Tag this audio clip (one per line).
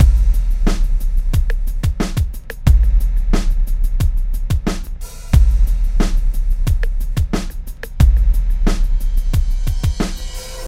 pop
beat
bars
deep
bass
atmospheric
kick
creak
breakbeat
crackle
4bars
continuum-4
sample
bpm
90
sub
spice
4